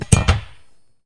another one accidental recording of the preparation for the rub beat
(see other sounds in the pack). this is a triple metallic kick and etc.